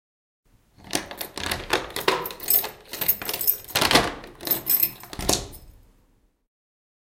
opening doors
On recording you will hear the door open with the big keys. Recorded on basement.
basement, doors, key, keys, lock, opening